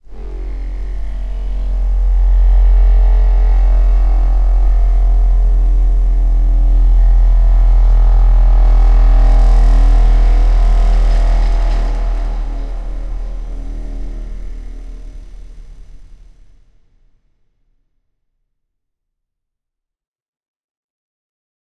A low frequency sweep hitting the natural resonant frequency of a large table, with a tad of post processing to make it more useful as a sound design component.